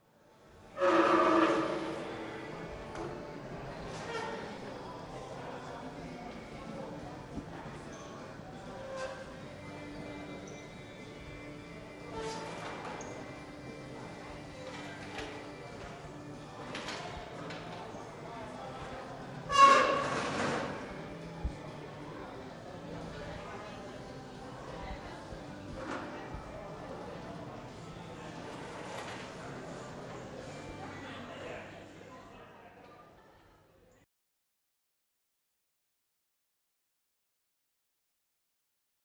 Cafeteria ambient
Sitting in a college cafeteria and recording the room.
big, talking, ambient, room, cafeteria, crowd